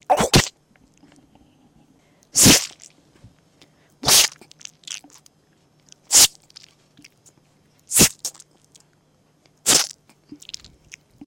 Knife Slits 1
combat, spit, hit, slit, gush, splat, knife, blood